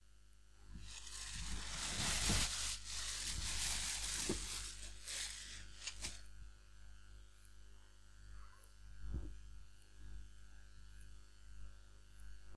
Noise from plasticbag.